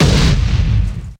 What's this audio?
Sampled from my beloved Yamaha RM1x groovebox (that later got stolen during a break-in).
explode, Yamaha-RM1x